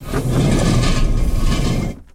Large containment chamber opening to reveal the sound of molten liquid before closing again. Created for a game built in the IDGA 48 hour game making competition. Original sound sources: water boiling (pitched down and heavily filtered), running (pitched down and heavily filtered) rocks scraping together, bricks and pieces of metal being scraped across concrete. Samples recorded using a pair of Behringer C2's and a Rode NT2g into a PMD660.
molten, smelter, liquid, game, computer